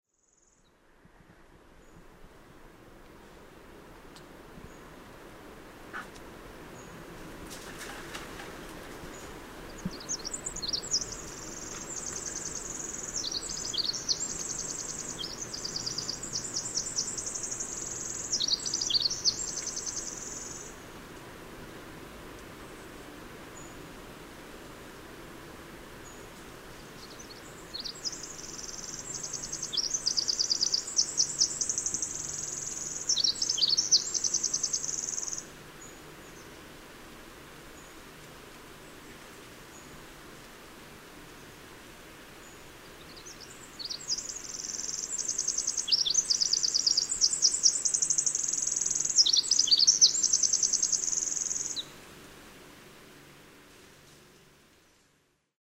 The recording was made on April 13th, 2008 in Butano State Park, San Mateo County, California, USA.